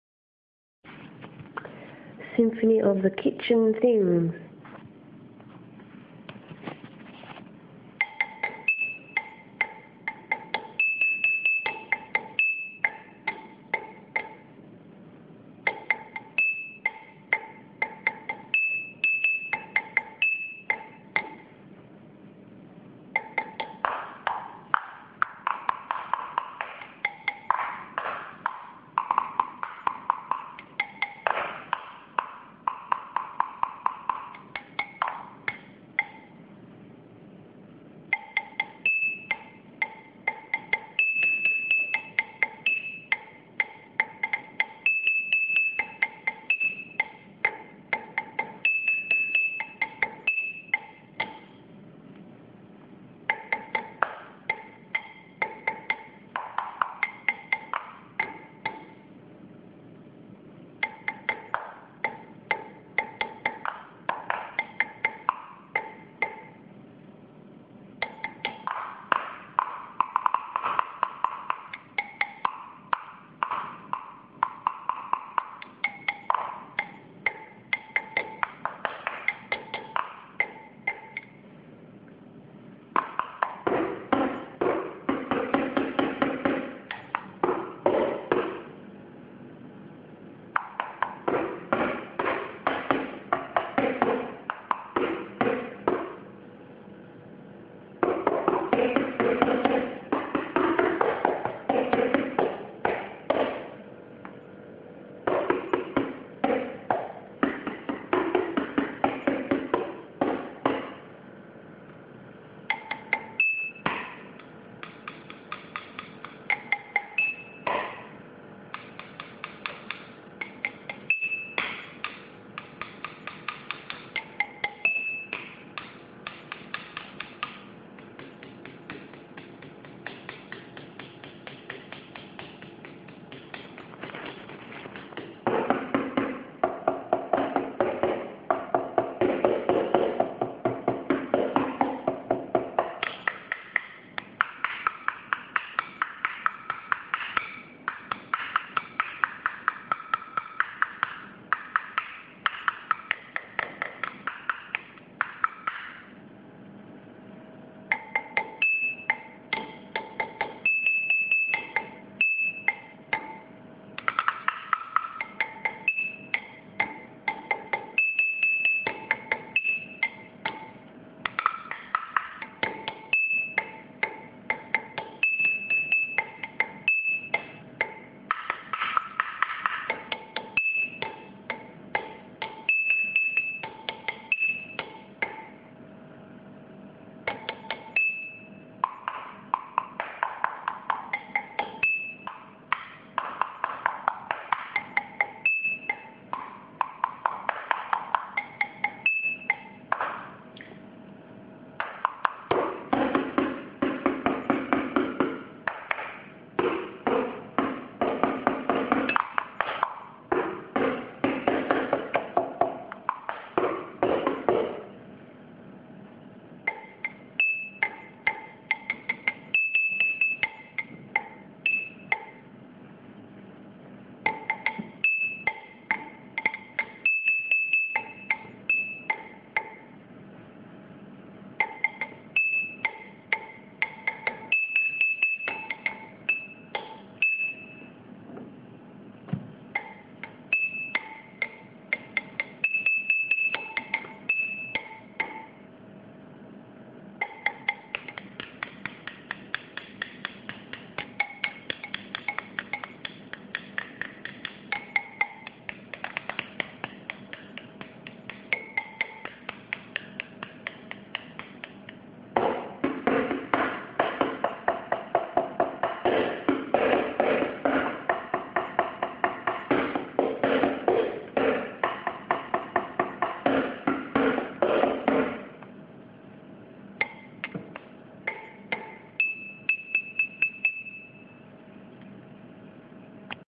Symph of kitchen things
A spontaneous improvisation in my friends kitchen with the small grocery boxes, jars and packets on the bench top, played with pens. Lo Fi recorded on Lifes Good mobile phone, converted in software
ambient, box, glass, hits, improvised, kitchen, percussion, percussive, sounds, thing